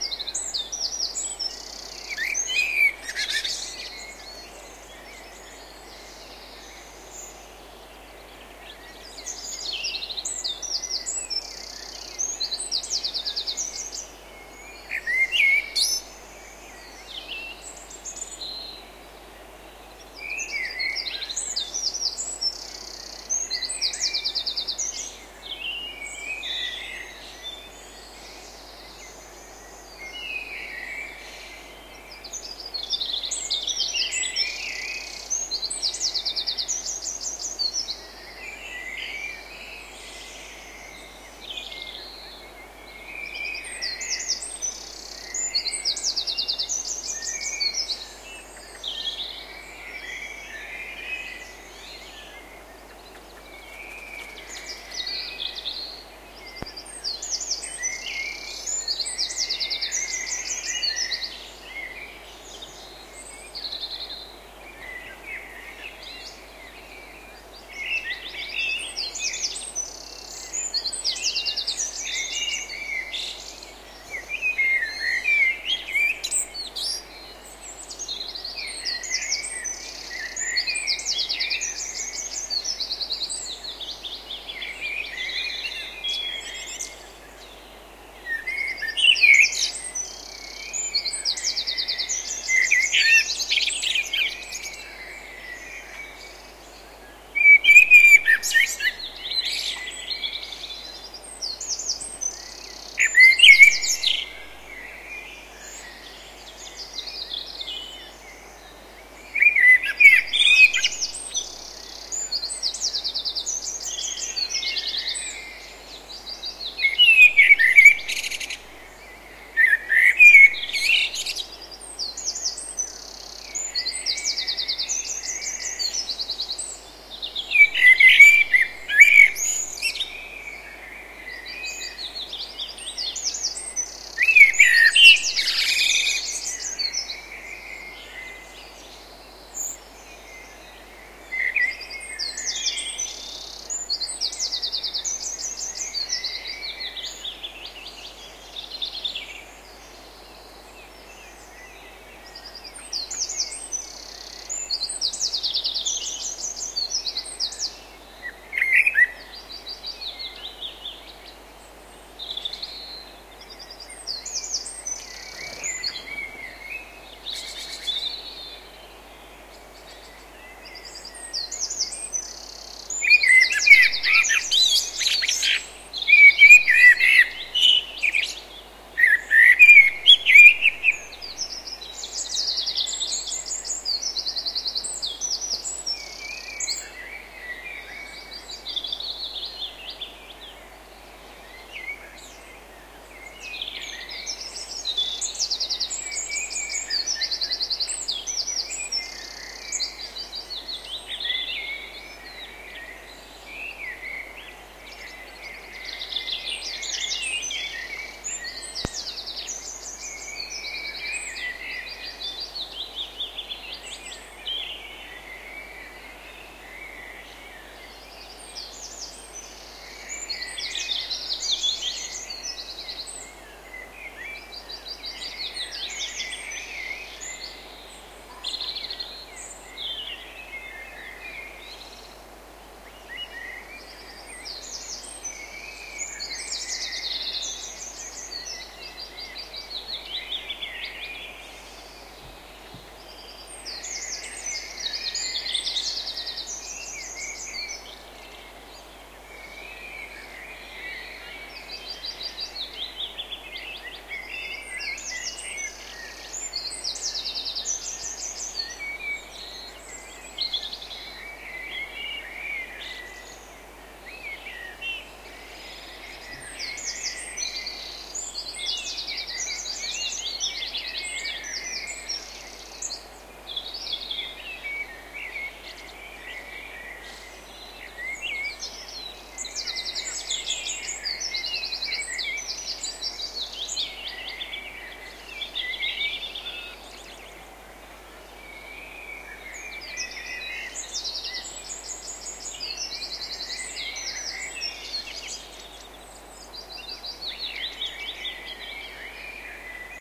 scottish morning 02
This recording was done on the 31st of May 1999 on Drummond Hill, Perthshire, Scotland, starting at 4 am, using the Sennheiser MKE 66 plus a Sony TCD-D7 DAT recorder with the SBM-1 device.
It was a sunny morning.
This is track 2.
If you download all of these tracks in the right order, you are able to burn a very relaxing CD.
field-recording, nature, birds, scotland, morning, dawnchorus, birdsong, countryside